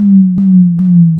Wrong selection
Created using Audacity and ton generation/manipulation.
Using this when someone scans a barcode in error, could be used in gaming/other apps.
fail, scan, negative, wrong, failure, error, mistake, select, barcode, game